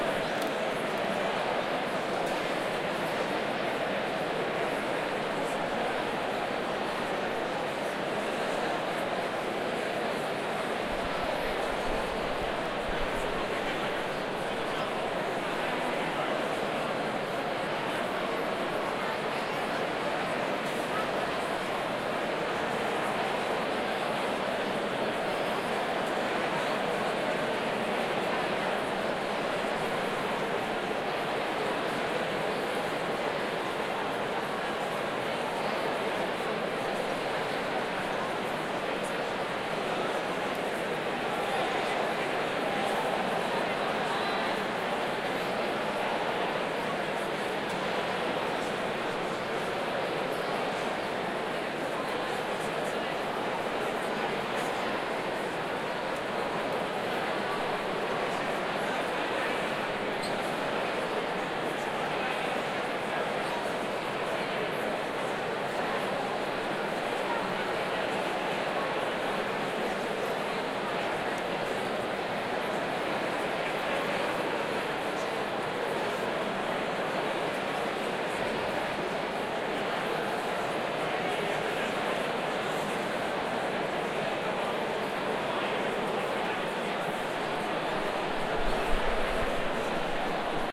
Large crowd from above stereo
A clean raw stereo recording of about a thousand people chatting with each other. No distinct dialogue. Recorded in stereo on an H4n. The microphone was positioned about 20 feet above and to the side of the crowd. Recorded in a big space with huge ceilings--would be suitable for a theatre, auditorium, rally, sports game, etc., but an experienced editor might be able to make this into an outdoor scene as well. There might be minimal handling noise.
ambiance, ambience, auditorium, Crowd, field-recording, hall, indoor, indoors, inside, people, stereo, talking, theatre, voices